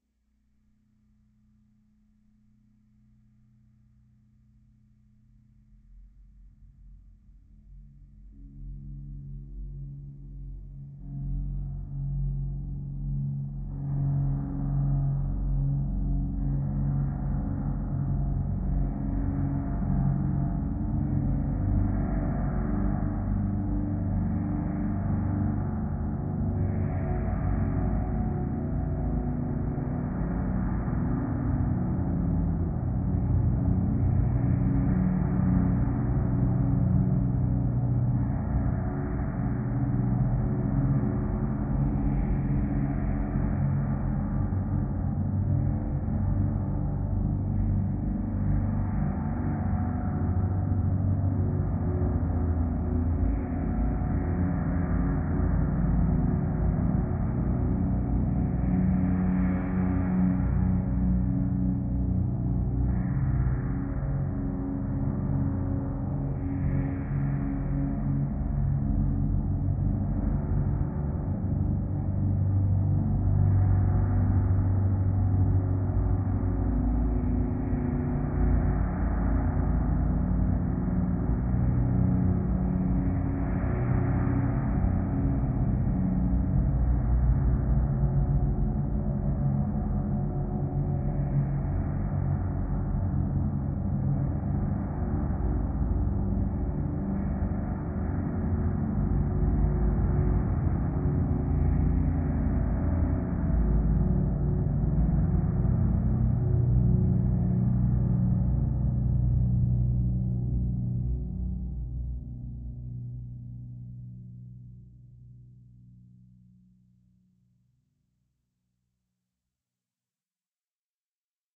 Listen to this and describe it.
Low, sustained drone
A long, low-end drone with subtle dark changes spread throughout. This sound was generated by heavily processing various Pandora PX-5 effects when played through an Epiphone Les Paul Custom and recorded directly into an Audigy 2ZS.